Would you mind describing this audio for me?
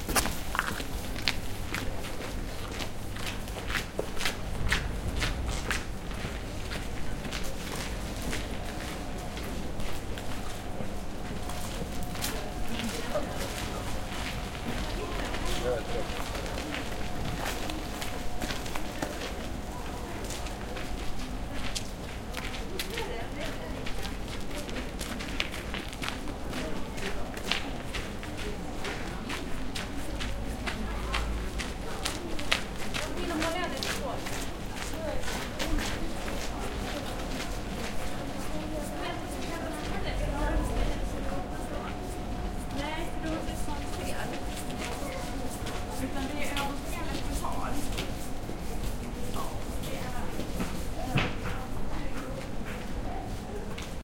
The pedestrian street in town with shops on both sides in a winterevening.
people, walking, talking, pedestrian, street